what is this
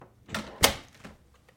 Door-Wooden-Heavy-Close-03
Here we have the sound of a heavy front door being shut.
Close, Door, Front, Heavy, Shut, Wooden